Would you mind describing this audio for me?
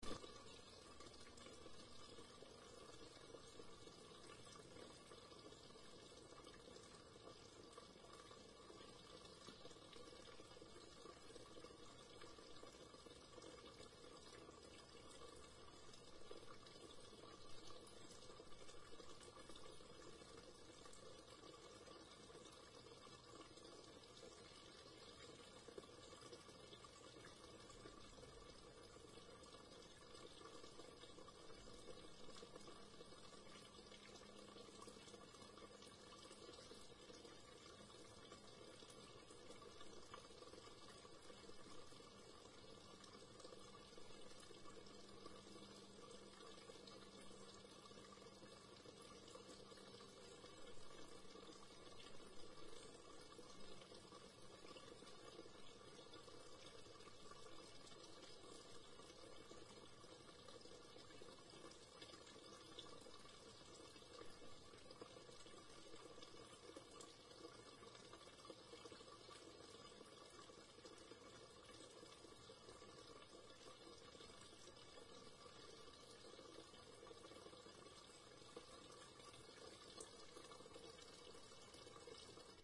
Recording of my two tear garden fountain, using my TASCAM DR-40
ambiance, ambient, garden, water, ambience, field-recording, 2-tear, nature, fountain